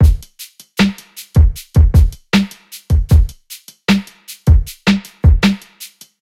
Tight snare Beat 155bpm
shakers, electronic, drum, bpm, 155, tight, hi-hat, snare, rhythm, hip-hop, beat, breakbeat, drums, hard, loop, kick, slow, drumloop